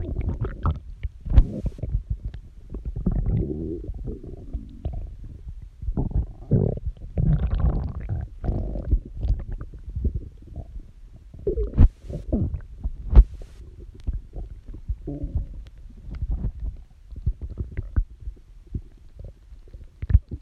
My gut was particularly loud after eating lasagna, so I figured I’d get some cool sound bites by pressing the microphone end into my gut.
Body, Digestion, Intestinal